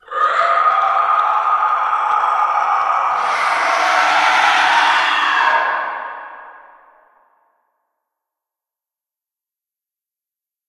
Dragon Death
The dramatic death of a giant inhuman beast.
Created using these sounds:
beast, death, dragon, horrific, horror, inhuman, monster, scary, screech